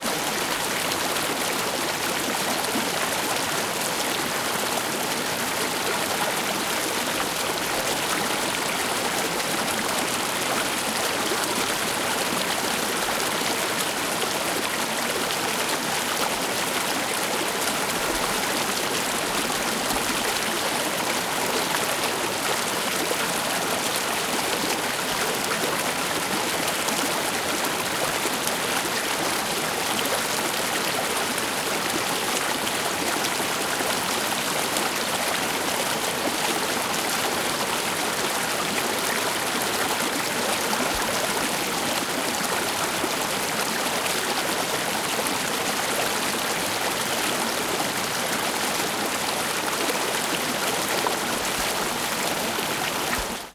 River Rapid Vinstrommen 4
Recording of Vinstrommen in the river Voxnan in Sweden with very high water level.
Equipment used: Zoom H4, internal mice.
Date: 15/08/2015
Location: Vinstrommen, Voxnan, Sweden